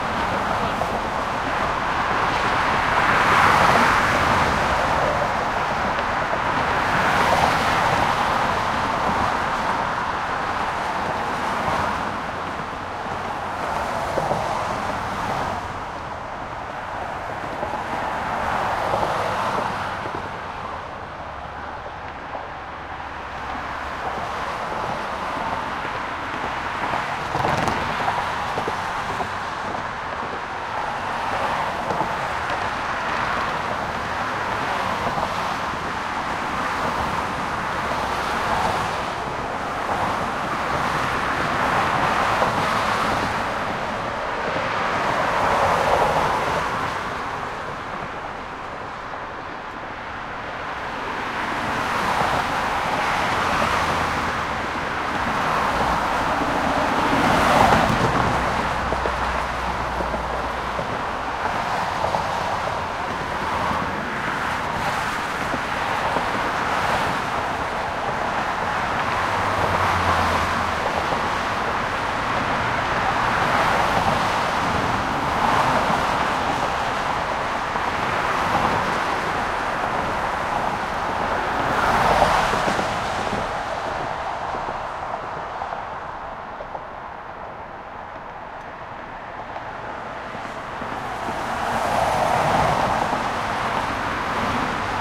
GBB center span east
Ambient recording of the Golden Gate Bridge in San Francisco, CA, USA at the center of the span, east side: traffic noise. Recorded December 18, 2008 using a Sony PCM-D50 recorder with wind sock.